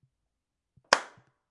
Hand Clap Recording at home